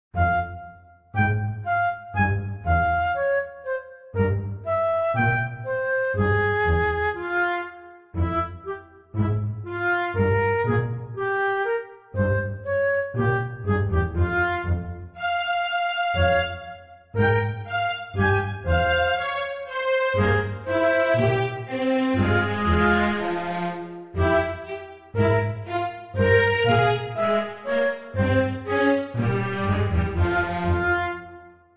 Sad Hero
This is one of the musical motifs that I composed on the theme of fairy tales of the Magic Land. This is just a musical score, I used the standard MuseScore3 soundbank to play notes. If you are interested, in my free time I can work on a complete music track, independent projects are welcome. To do this, just send me a message.